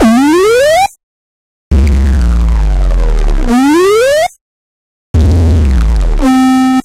Slider 140bpm
8-bit, awesome, chords, digital, drum, drums, game, hit, loop, loops, melody, music, sample, samples, sounds, synth, synthesizer, video